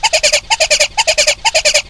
20100918.alarm.grebe
loop built from a single bird call ((Little Grebe, presumably). Recorded with Sennheiser ME62(K6) at Centro de Visitantes Jose Antonio Valverde, Donana, Spain
alarm, birds, cell-phone, field-recording, ringtone